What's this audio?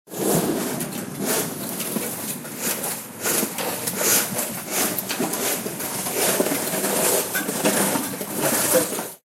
Snow harvesting in Moscow, Russia
cleaning, clean, harvest, snow